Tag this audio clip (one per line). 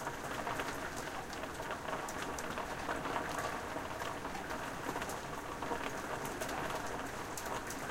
atmosphere
rain